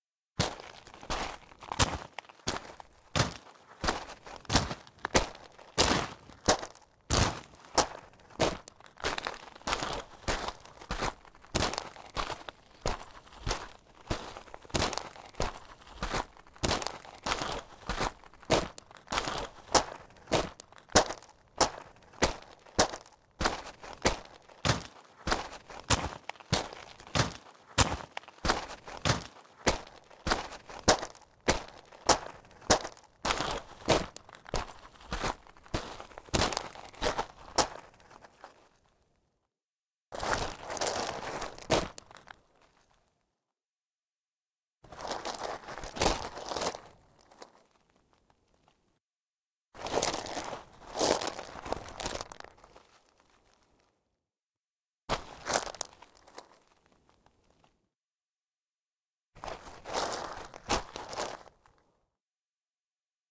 walking on river gravel (small stones) from a very close perspective.
EM172 (on shoes)-> Battery Box-> PCM M10.